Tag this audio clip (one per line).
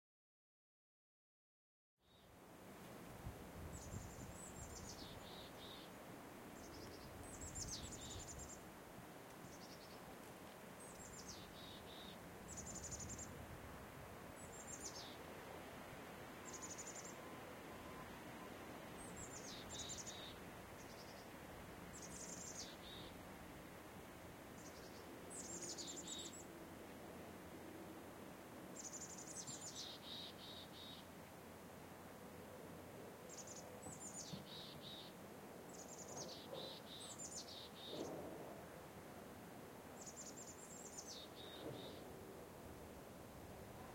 wind
snow
ambient
trees